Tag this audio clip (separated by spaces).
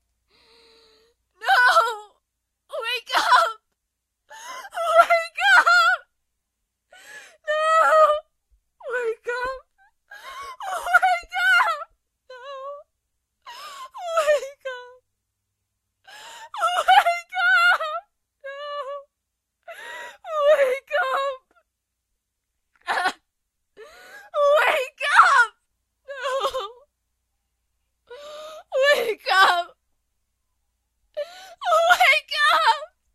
voice sad wake emotional tears up female crying acting scared no hurt whisper upset worried